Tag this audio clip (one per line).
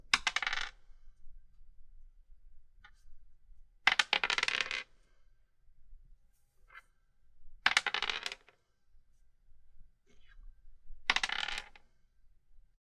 dice
die
game
plastic
roll
table
wood